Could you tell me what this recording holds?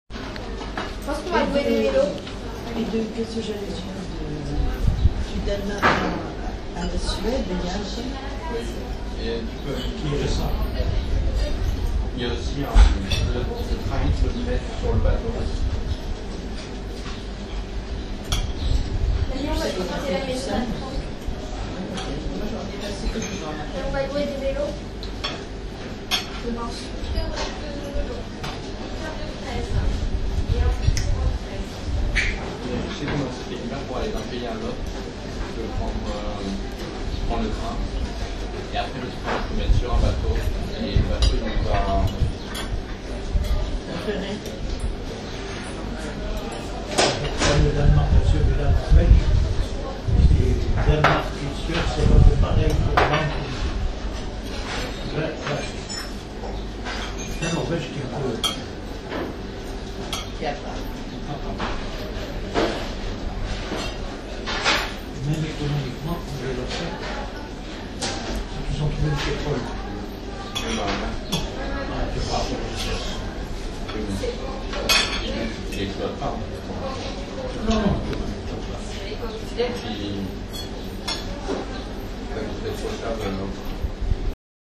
marseille frioul ile restaurant
Recorded at the restaurant
island, restaurant, frioul, french, marseille, talk